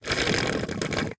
suck in 8
various sounds made using a short hose and a plastic box full of h2o.